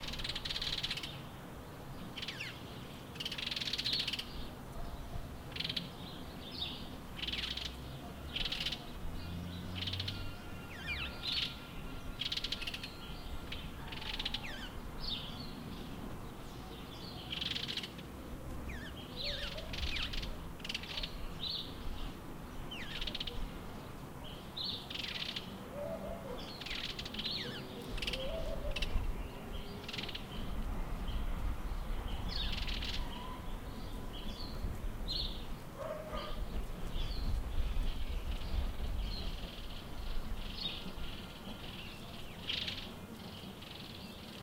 Ambient sound of birds in forest